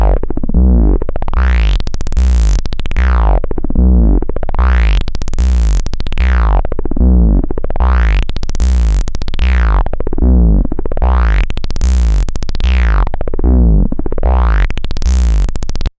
bass stretch 3
Raw analog Bass stretch sounds, oscillating goodness, from my Moog Little Phatty + the CP-251 voltage attenuator plugged into the pitch cv control
analog, bass, stretch, synth